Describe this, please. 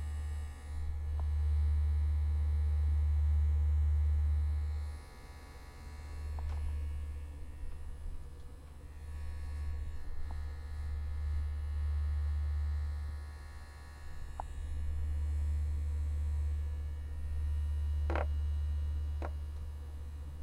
17 Vibrating device; near; bassy;
Vibrating device; near; bassy; phone; vibrations; massage; dildo;
dildo, phone, Vibrating, vibrator